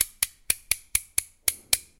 découpe avec un cutter